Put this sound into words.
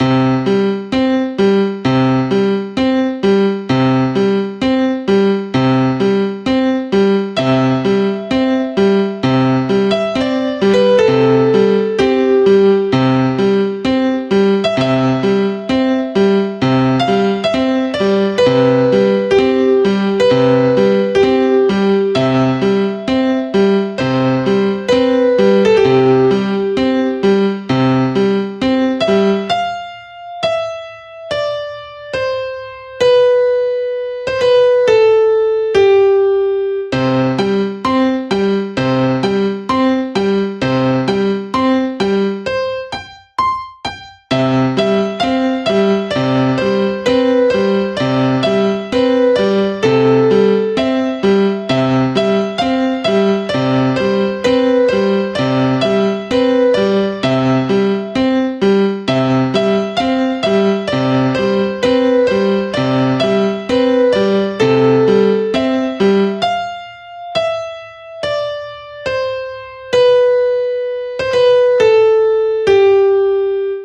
Laptop Piano Practice
Me practicing using the laptops keyboard.
Synth, practice